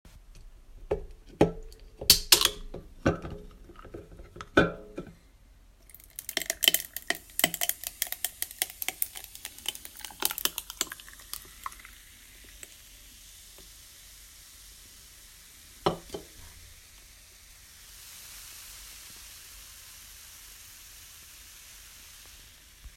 Pouring Nalu in a glass with ice